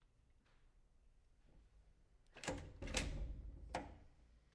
009 House DoorHandle
door handle sound